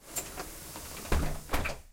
bus door closing
Closing bus doors